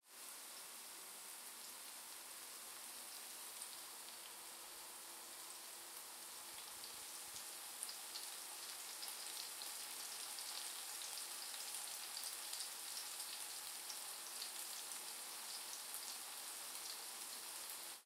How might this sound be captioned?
Frying Pan Noise 5
Recording of food frying in a pan with oil.
Processing: Gain-staging and soft high and low frequency filtering. No EQ boost or cuts anywhere else.
Water, Cooking, Pan, Frying, Fire, Noise, Kitchen, Stereo, Sound, Heat, Rain